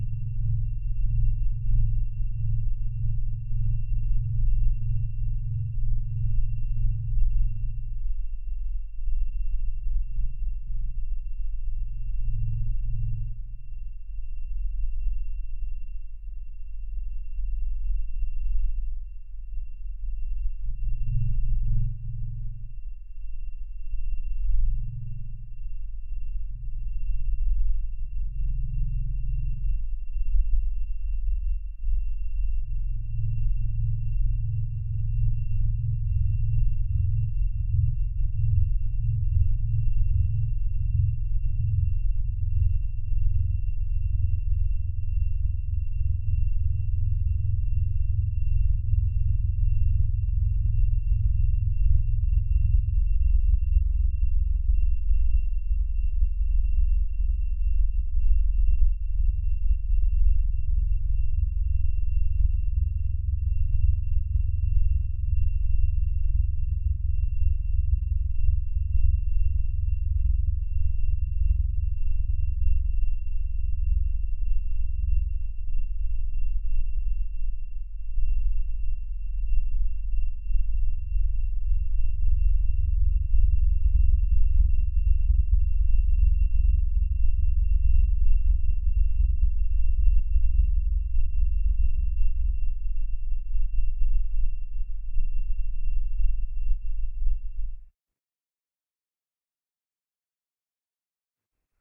A low bassy rumbly science fiction sounding sample with a high pitched tone in the background.